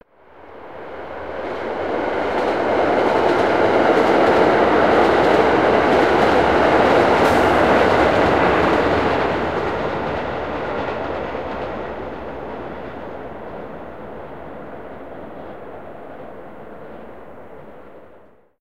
A Greater Boston Area commuter rail passing by.
Recorded with a Sony PCM-D100 in the evening in a Boston suburb.